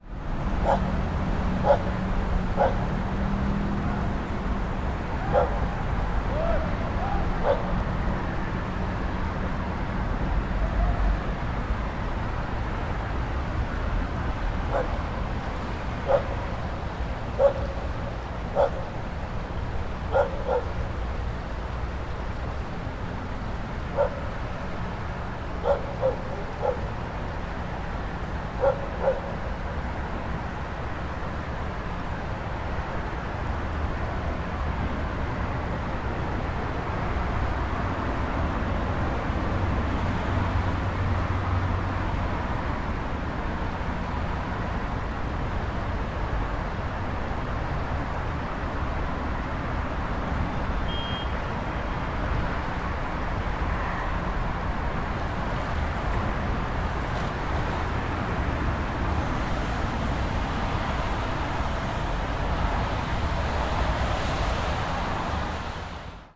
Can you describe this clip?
0287 Dog barking
Dog barking from far away. People shouting. Traffic in the background.
20120608
seoul, korea, dog, field-recording